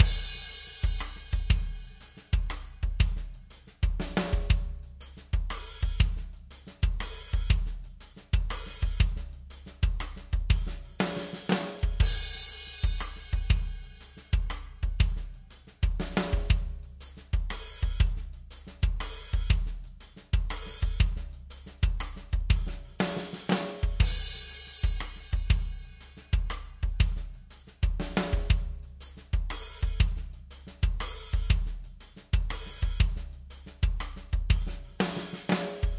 Song5 DRUMS Do 3:4 120bpms
HearHear, bpm, loop, Chord, beat, rythm, Do, 120, Rhodes, blues